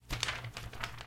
paper on wall